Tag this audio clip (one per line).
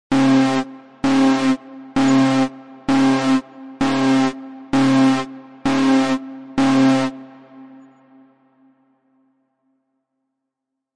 alarm
alert
alien
atmosphere
bridge
digital
electronic
emergency
energy
engine
fiction
fire
future
futuristic
fx
hover
noise
science
sci-fi
sound-design
space
spaceship
starship
warning
weird